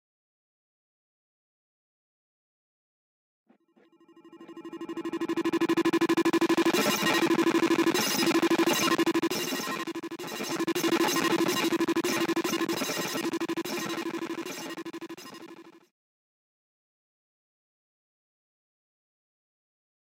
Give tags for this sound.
experimental
glitch
mini-moog

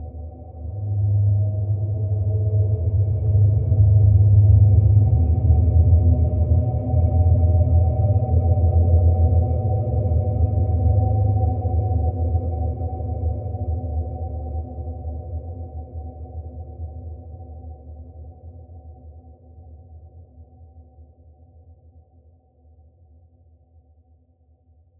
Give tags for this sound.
ambient
deep
drone
soundscape
space

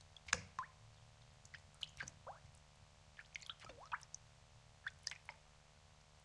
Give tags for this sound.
drop
shake
water

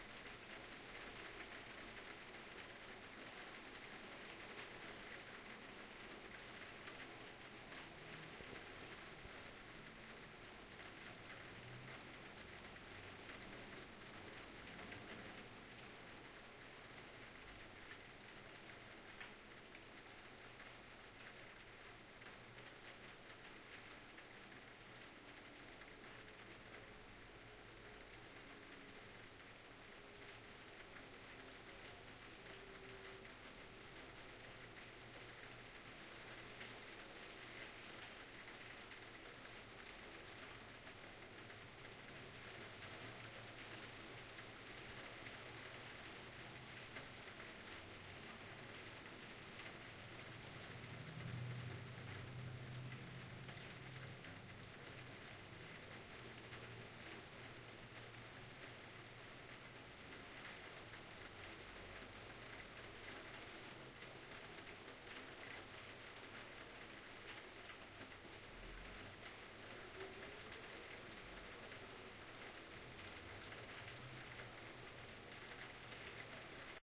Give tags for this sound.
calm
distant
rain
roof
traffic
window

